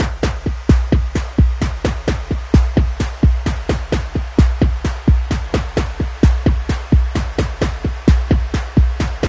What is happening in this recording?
drum set 130
an electronic drum with in the background a distort white noise.Made in a drum plug in.